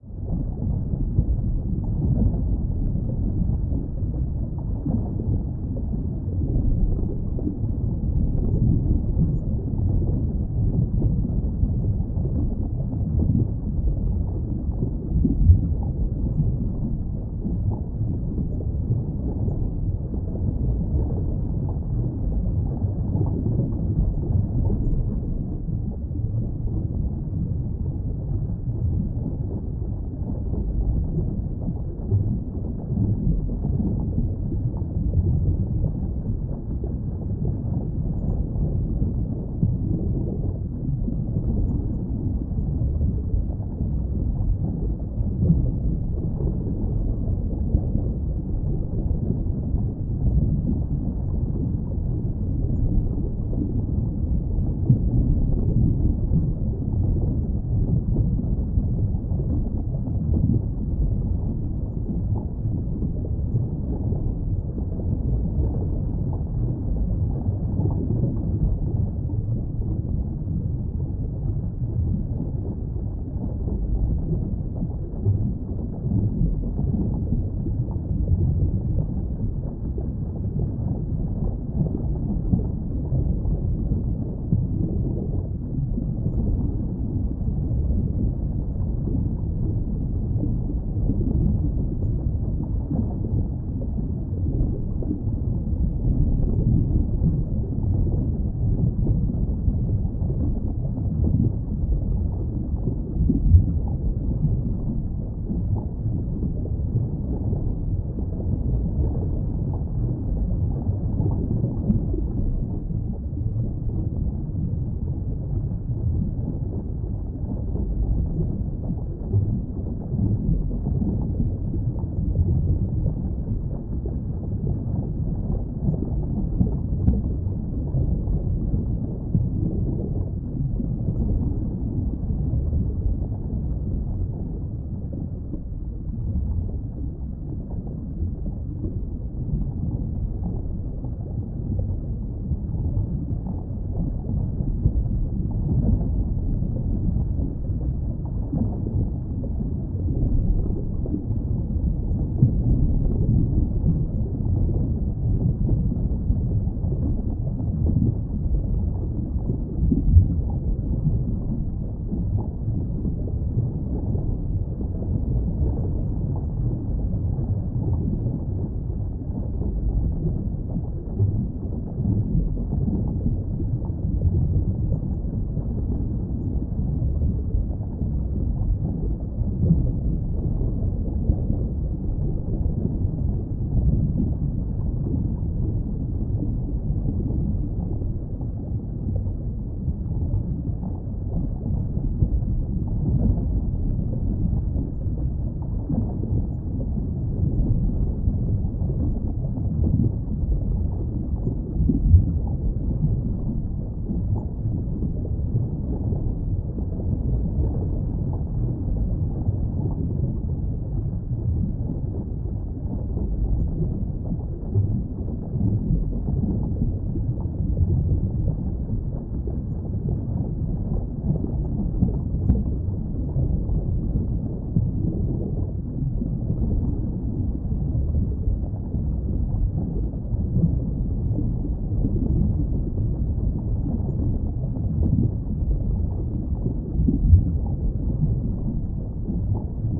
Underwater [Loop] AMB
ENJOY!
Please like and share!
A loop-able ambiance of being submerged in water for your underwater needs. This one was particularly difficult to process as I had to layer many scuba diving sounds in one order to get this effect. Only after crushing many frequencies via EQ, is when it gets this deep. Get it? Deep like an ocean? No? okay I swim away now...
Enjoy =)
- Noise Reduction
- high pass at 30kHz
- Artifact Removal
- Noise Removal
- Bass enhancement
- Low pass
- Layering
- Stereo imaging
- Stereo widening
Details:
- GoPro Hero 4
Crediting: Optional